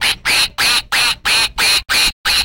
An animatronic Easter duck at RiteAid.
quacking, toy, duck, animatronic, drugstore, easter